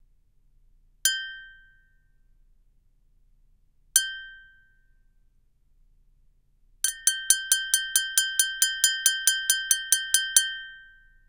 -Coca-Cola brand glass clanks